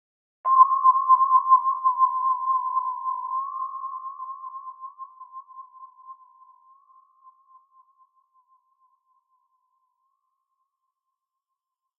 FS 01 Bram Meindersma | Bleep
Electric piano tone with FX
bleep, blip, chorus, radar, rhodes, sounddesign, spherical, submarine, sweet, tremolo